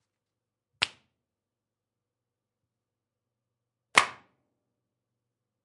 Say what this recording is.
Dropping record on concrete floor
Dropping a vinyl record from about one meter on concrete floor.